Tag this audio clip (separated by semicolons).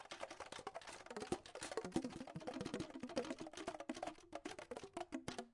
howie; sax; smith; noise; pad